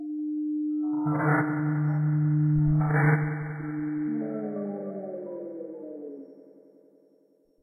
cd load minisamp2
sound of a CD drive loading a CD / reading the header. background fuzz filtered, stereo delay added.
lo-fi, experimental, sci-fi, pseudo-glitch, industrial, machines